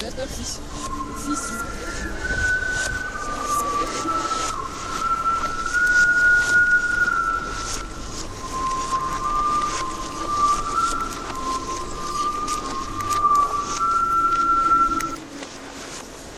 GILLES ELEONORE 2016 2017 WeirdReverse
I took voices that we had on a recording, i added a reverse effect with Audacity and then I took a whistling, i added the same reverse effect and I slowed down the tempo to make the atmosphere even more creepy and weird than it was before.
Description selon Schaeffer
Typologie: V’’
Masse: Groupe Nodal
Timbre harmonique: acéré
Grain: rugueux
Allure: non
Dynamique: abrupte et graduelle
Profil mélodique: glissante
creepy, haunted, horror, scary, sinister, spooky, strange, weird